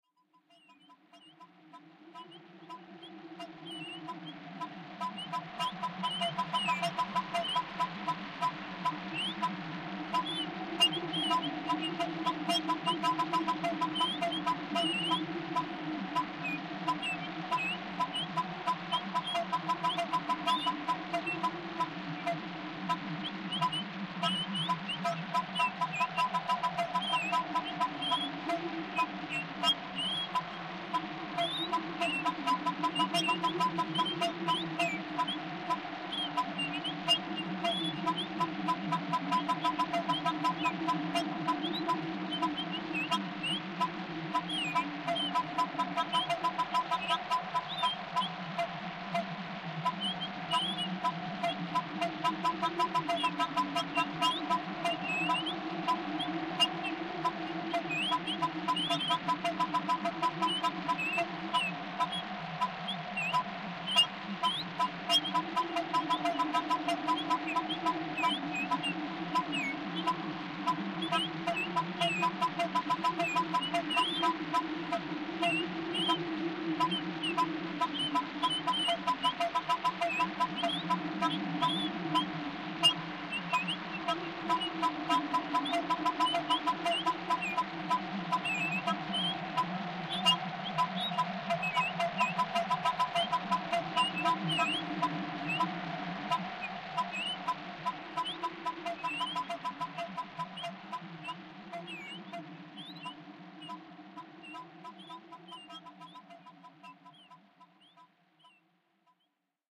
An atmosphere created with a few of Xoxos plugins from his bundle "The sounds of nature", wind, rain, sparrow and goose are all synthesized inside his plugins. Effects applied: Reverb and delay. Created with FL Studio 7 XXL
environment, sparrow, rain, goose, authumn, storm